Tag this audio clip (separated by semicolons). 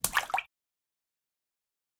aqua; aquatic; bloop; blop; crash; Drip; Dripping; Game; Lake; marine; Movie; pour; pouring; River; Run; Running; Sea; Slap; Splash; Water; wave; Wet